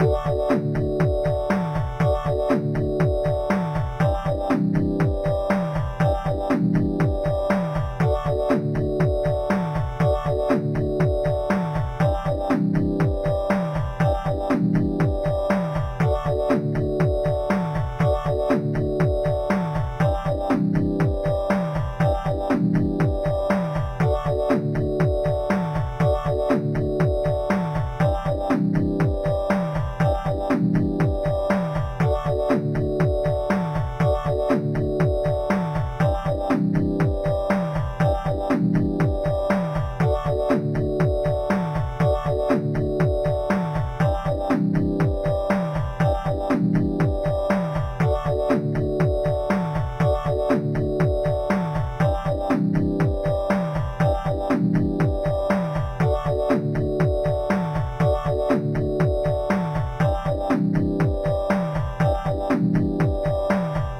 8 bit game loop 008 simple mix 3 long 120 bpm
music, 8bitmusic, 8-bit, loop, 8-bits, electro, drum, mario, sega, bass, nintendo, loops, gameboy, bpm, 8, game, josepres, 120, gamemusic, beat, free, 8bit, electronic, gameloop, synth, bit